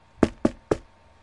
me knocking my hand on a book. Recorded and edited in Audacity.
Bizinga